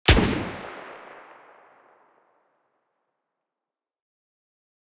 GASP Rifle Shot
Sound FX for firing a rifle.
Action, Shot